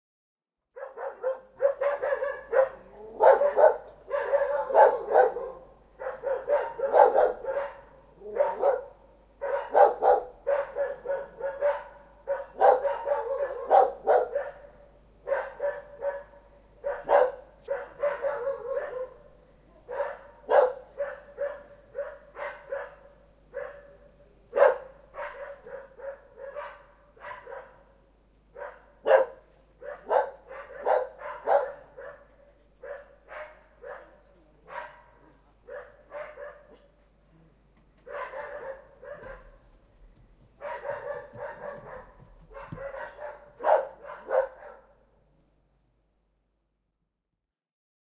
Field-Recording.PB.Dogs
Going from the Pousada to the beach, we met those “chatting” dogs on the way.
Recorded with MD MZR-50 Mic ECM907